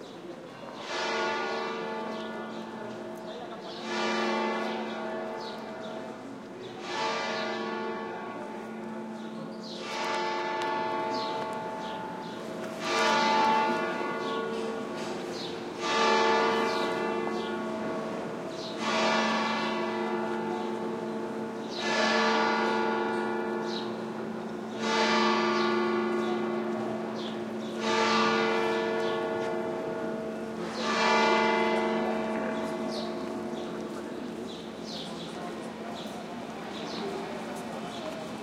bell striking 11 near Concatedral de Santa Maria de la Redonda (Logrono, Spain). Shure WL183, Fel preamp, PCM M10 recorder